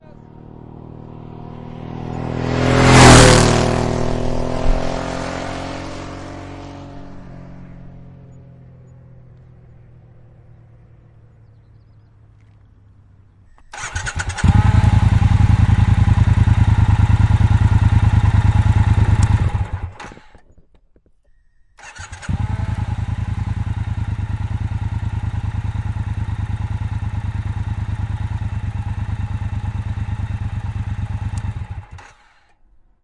sounds of triumph bonneville speedmaster motorcycle stereo
various sounds of a bonneville speedmaster motorcycle
bonneville, motorcycle, speedmaster